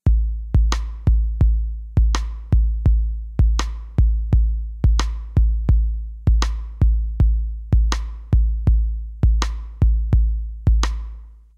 music game, bgm
Music created in Garage Band for games. This is a drum beat that plays when you select game mode, etc.
beat, bgm, drum, game, game-music, music, music-game